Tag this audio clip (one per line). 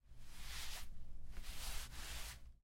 cloth
roce
tela
touch